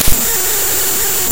Raw import of a non-audio binary file made with Audacity in Ubuntu Studio
binary, computer, data, digital, distortion, electronic, extreme, file, glitch, glitches, glitchy, harsh, loud, noise, random, raw